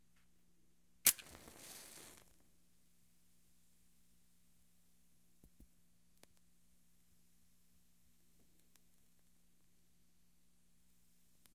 The strike of a match and letting it burn a bit.